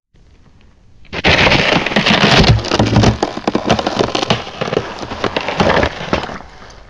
Ice 6 - Slow
Derived From a Wildtrack whilst recording some ambiences
sound, BREAK, crack, effect, walk, step, frost, freeze, field-recording, cold, foot, ice, footstep, frozen, winter, snow